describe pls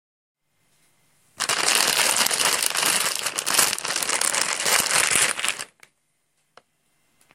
Plastic bag sqeezed
A plastic bag is sqeezed by hand
Plastic, sqeezed, bag, sqeeze, hand